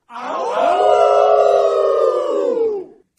In Act I of Leading Ladies, the Moose Lodge members call out "Awhoo". I recorded the cast making the call, which was then used during the performance. Recorded with a Zoom H2.
Moose, Leading, life-recording, Ladies, Lodge, moose-call, awhoo